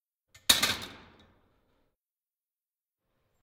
dropping the barbell Pokladani cinky 2
Dropping the barbell.